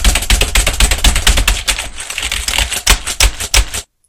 Mashing on a computer keyboard
Don't do this to your keyboard! You'll break it!! (Useful for situations where a character has given up on solving a computer issue and is just mashing their keyboard out of frustration. Ultimately, of course, this fixes their problem.)
typing, computer, keyboard, keystrokes, PC, mashing, type, mash, desktop